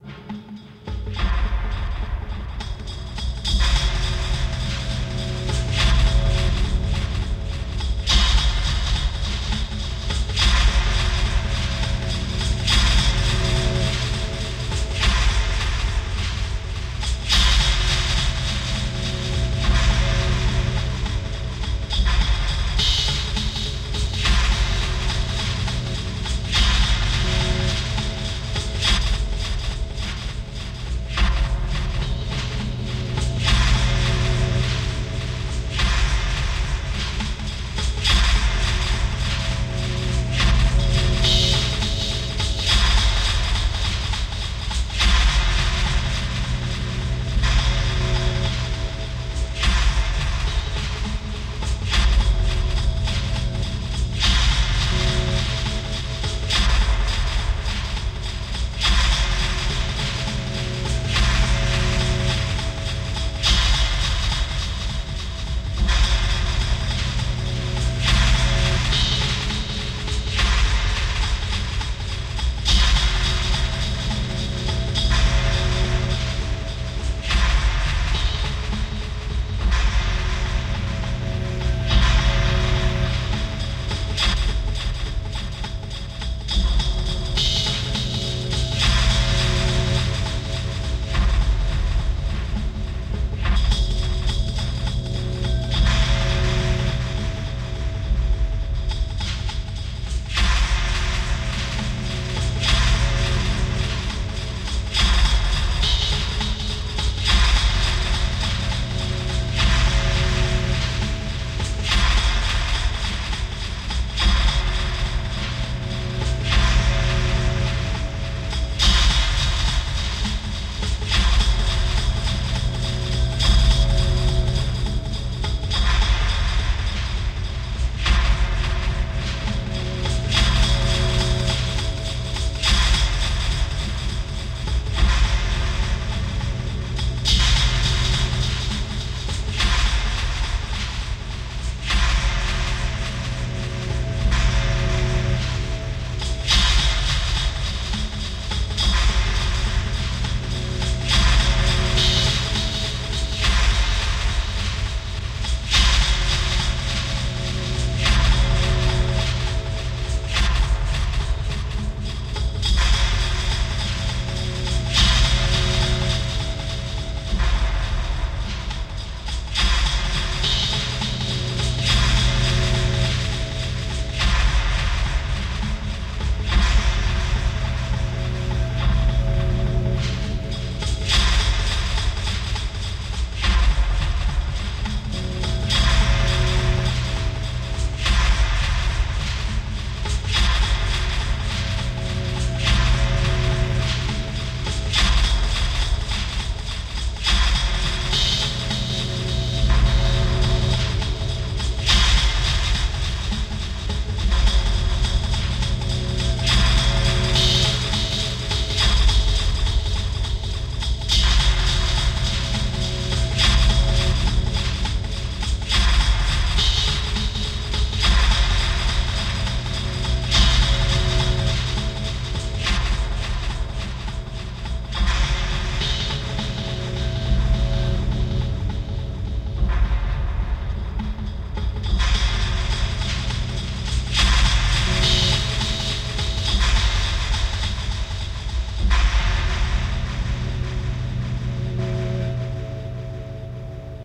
8tr Tape Sounds.
artistic, philosophical, futuristic, tape, magical, fantastic, notions, pluralistic, scientific